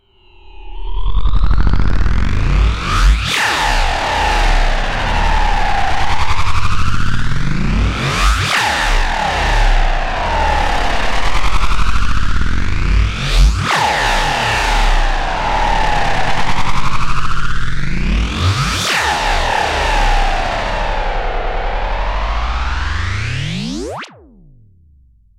Alien Boomerang 02 Distorted
24_48-Some experimentation with various plugs produced this noise.